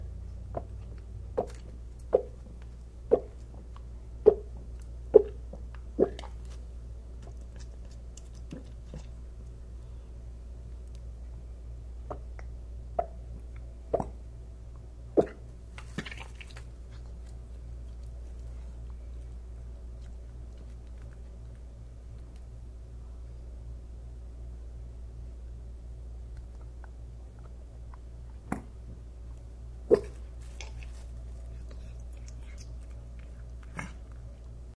Mocha the fat cat throwing up recorded with Olympus DS-40 with Sony ECMDS70P.